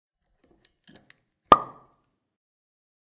Opening a bottle of wine.
bottle, cork, open, opening, wine